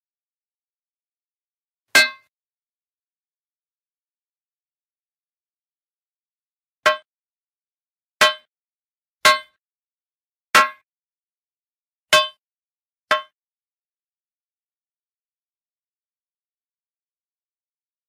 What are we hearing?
hitting different metallic pumps